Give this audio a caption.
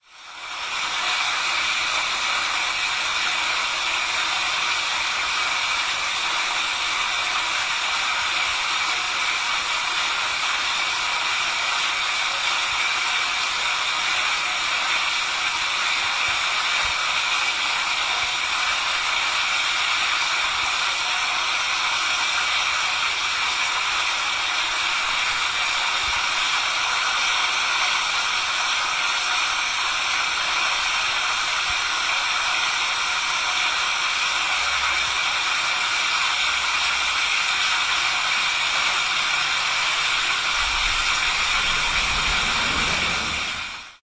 catch pit 221210
22.12.2010: about 00.20. crossroads of Rozana and Gorna Wilda Streets in Poznan. the sound from the hidden catch pit.
catch-pit, field-recording, noise, poland, poznan, street, swoosh, water